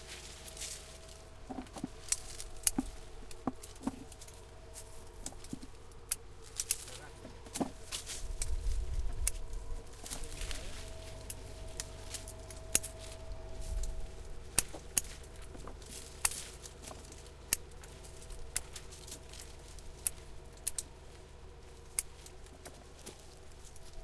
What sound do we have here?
2 tisores i tractor
Field recording of people working during the wine harvest in the Penedès area (Barcelona). Recorded using a Zoom H4.
outdoors, pened, s, scissors, tractor, wine-harvest